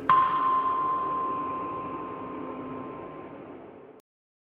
glockenspiel C 1 ambience
I couldn't find any real and free glockenspiel sounds,so I recorded my own on my Sonor G30 glockenspiel with my cell phone...then I manipulated the samples with Cubase.I hope you like them and do whatever you want with them!